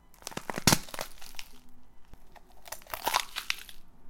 Bell pepper squeezing
Foley used as sound effects for my audio drama, The Saga of the European King. Enjoy and credit to Tom McNally.
This is the sound of me squeezing a ripe, raw bell pepper in my bare hand. There's a lovely wet implosion sound and some squishing that would work well for some over-the-top gore or fleshy explosions.
bell-pepper, crush, gore, head-explode, pepper, squash, squelch, squish